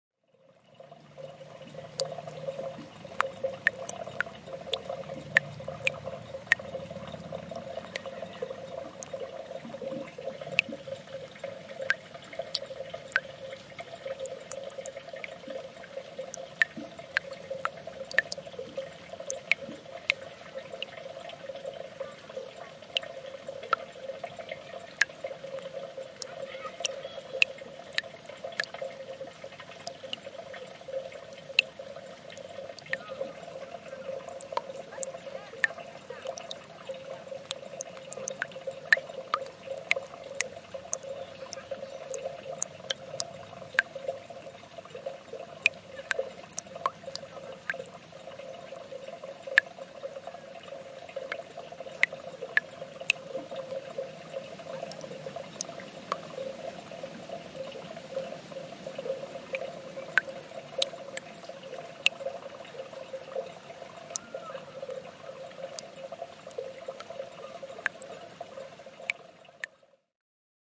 Very Slow Dropping Water
Single small fountain recorded with a Zoom H4